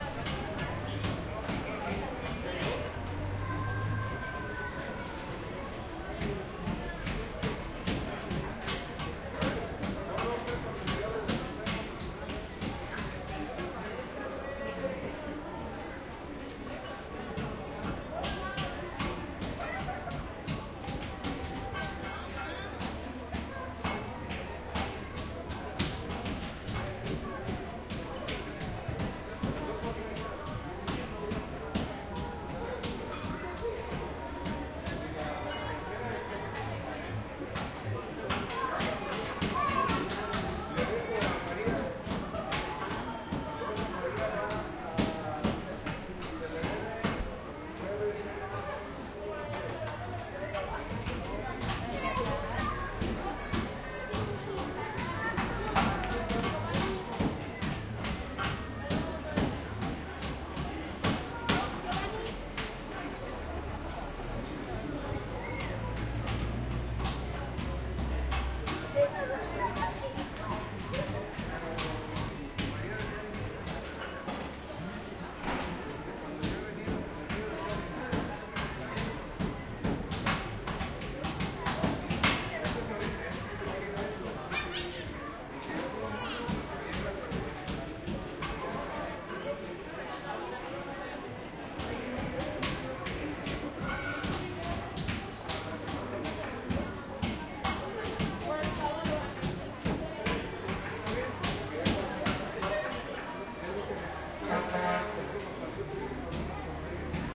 SimSon SanMiguel2017 014
Indoor market (mercato) in San Miguel del Allende, Mexico. Near a butcher's counter - he is hammering meat.
Market, Mexico